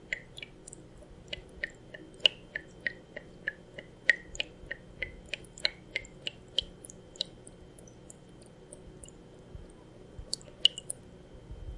water dripping drop / gotas agua
drip, drop, liquid, splash, water